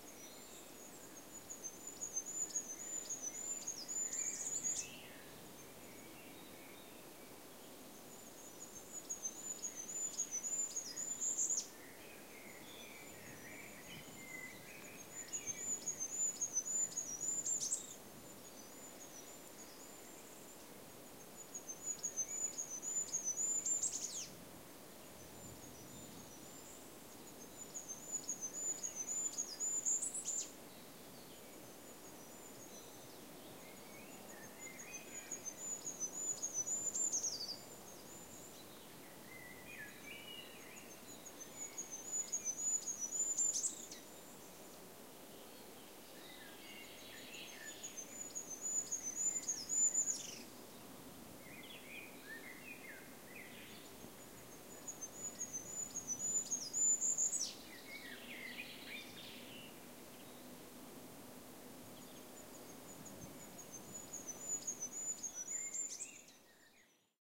Goldcrest [Regulus regulus] calling in two different ways in a forest in the German Black Forest region at springtime. Zoom H4n